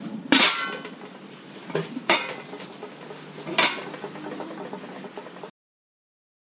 a lid pot of enamel
lid, metal, pot